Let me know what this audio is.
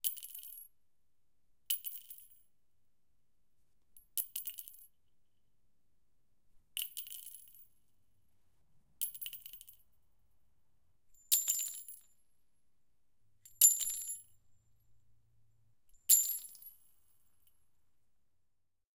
bullet shells - handfull - consolidated
Dropping handfuls of bullet shells onto concrete from a height of 25cm.
Recorded with a Tascam DR-40 in the A-B microphone position.
ding, bullet, gun, clink, shells, ammunition, bullet-shell, metal, metallic, shell